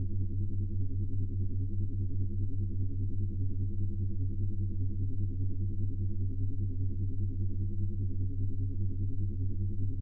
A synthesized starship hum modelled after the background noise in Star Trek: TNG.